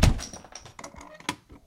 Door open with creak and clatter